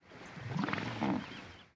Hippo-Pet
Noise from an hippopotamus in Tanzania recorded on DAT (Tascam DAP-1) with a Sennheiser ME66 by G de Courtivron.
africa, tanzania